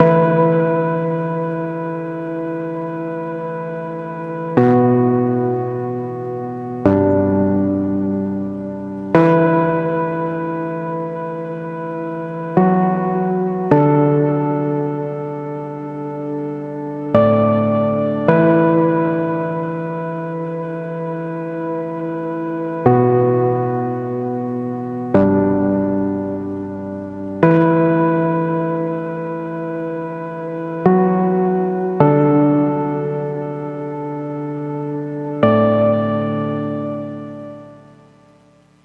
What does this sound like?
Circuit 2 - Keys 3
Synth Keys Loop
105 BPM
Key of E Minor